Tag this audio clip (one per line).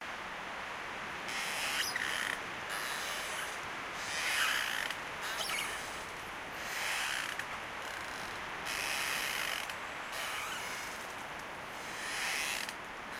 clothes
drying
wind